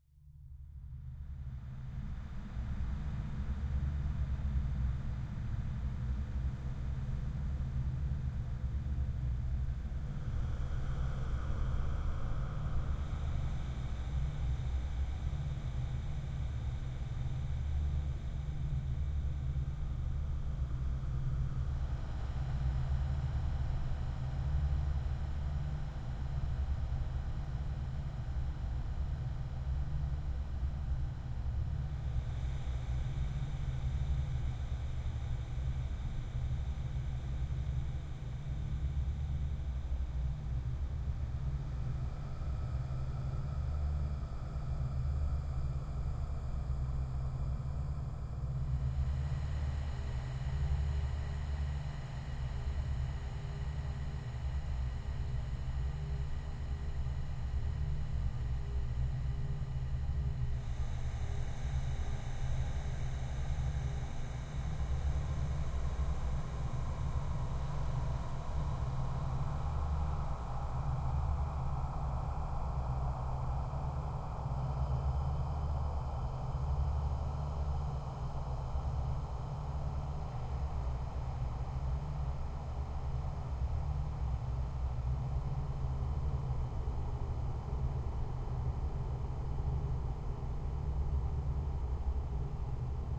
AMB HORROR TIPO RESPIRO
terrifying rumble environment horror suffered breathing
anxious, bass, breathing, creepy, deep, drama, environment, haunted, horror, phantom, rumble, sinister, spooky, suffered, suspense, terrifying, terror